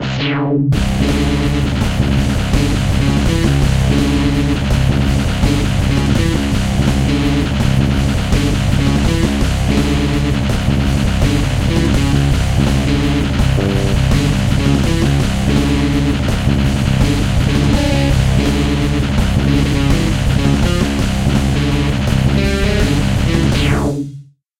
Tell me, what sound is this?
The attack is sudden and relentless!
Robo spiders have no defense mode.
You can do whatever you want with this snippet.
Although I'm always interested in hearing new projects using this sample!